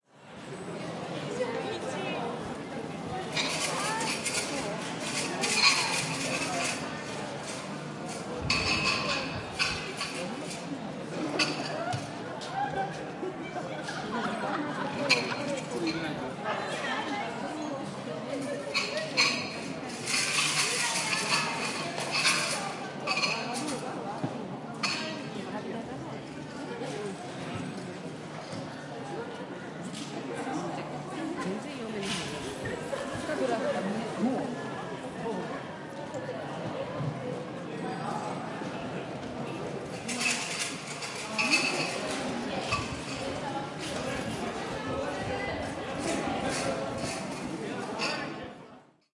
Recorded at the Asakusa Sanja Matsuri. Metallic sound is people shaking Omikuji, a metal box containing fortune sticks. Recording also contains voices and general atmos. Recorded on a Zoom H4 in May 2008. Unprocessed apart from a low frequency cut.